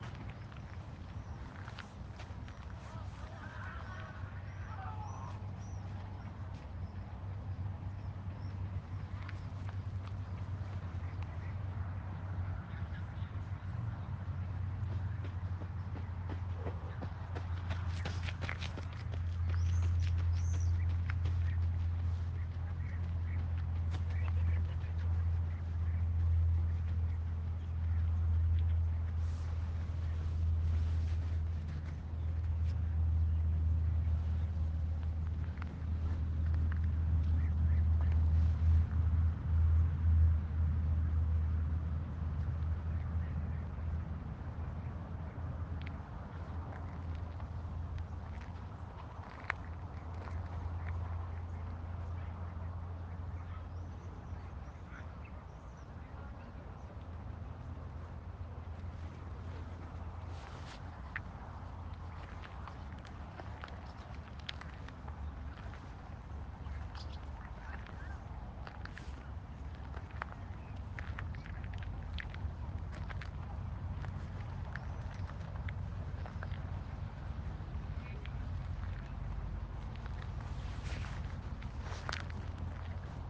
Casa de campo
Soundscape project in Madrid - CEA El Águila.
ambient, soundscape